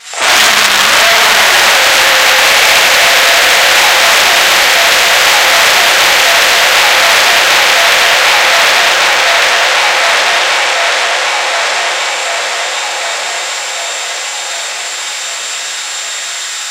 Made with a $5 microphone, Cakewalk and my own VST distortion plugin